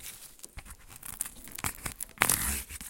Queneau manip carton 02
grattement sur un carton alveolé